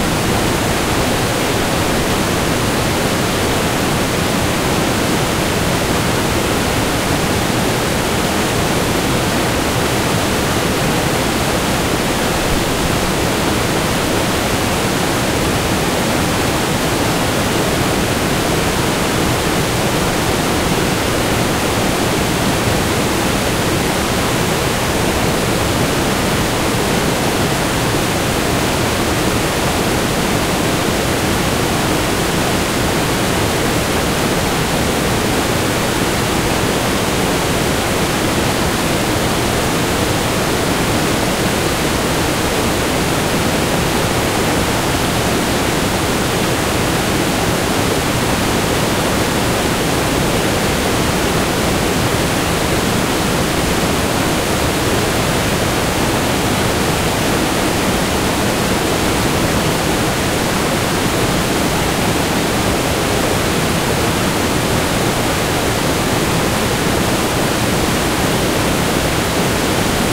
waterfall alberta rmnp 02
Perched about 25 feet over Alberta Falls. Recorded in Rocky Mountain National Park on 19 August 2008 using a Zoom H4 recorder. Light editing work done in Peak.